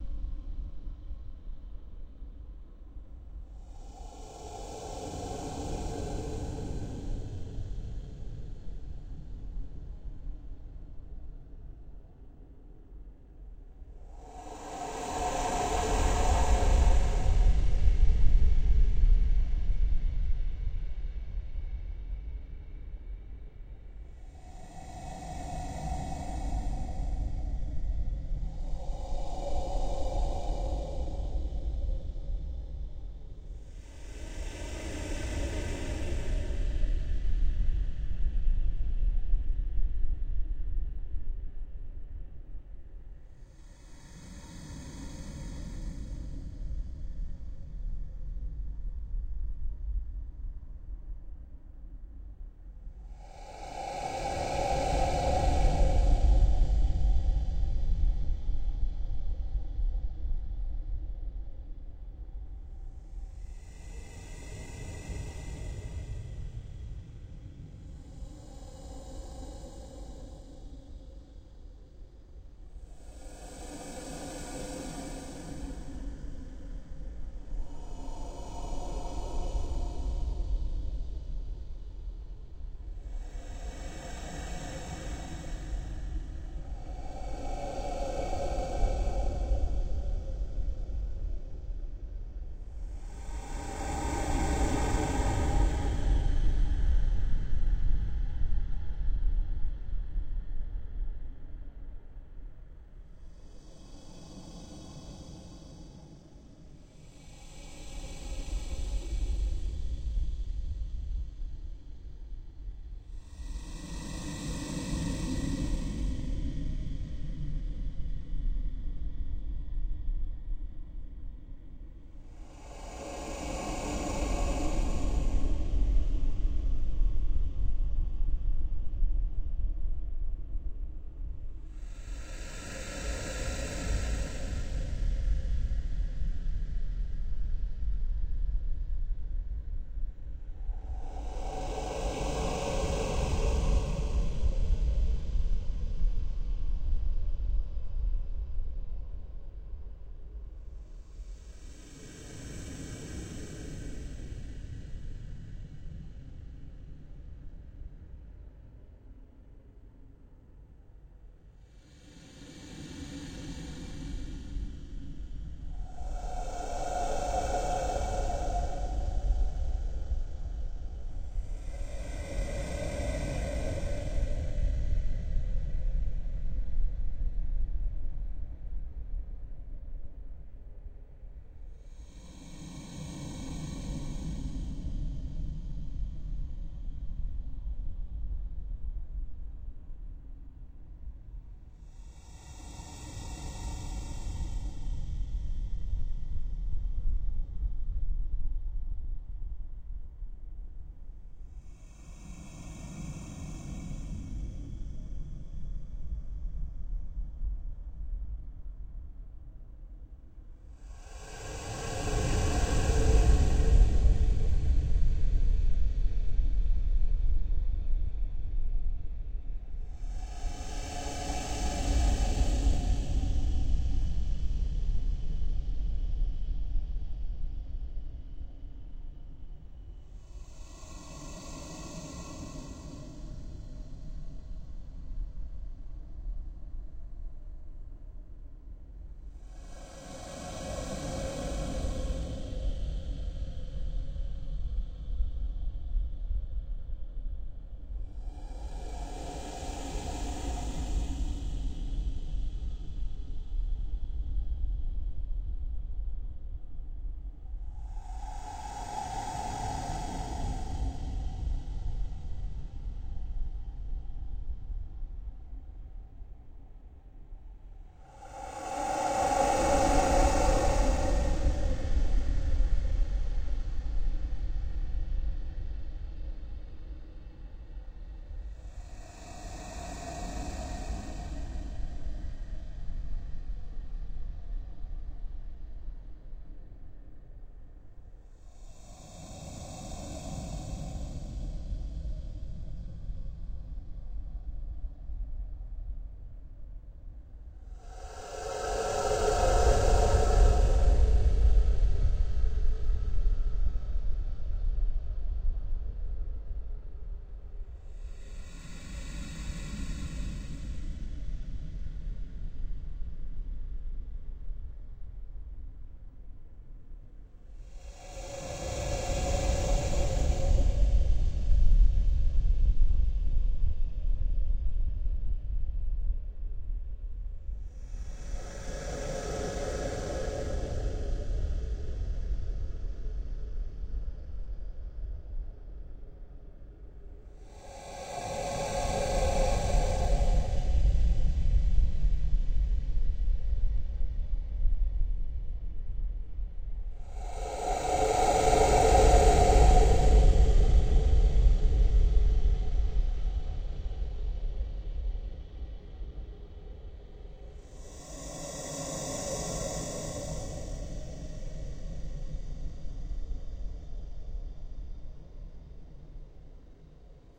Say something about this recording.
Edited from Table Drums.